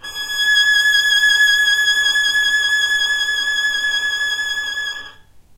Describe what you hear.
violin arco vib G#5
violin arco vibrato
vibrato, violin